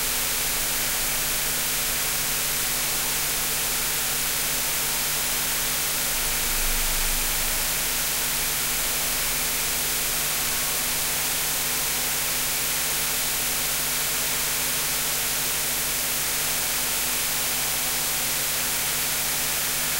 Brus SpaceEchoRE-501 Echo+Spring
This is how a Roland Space Echo sounds if you don't run a signal thru it.
space, roland, noise, spring, echo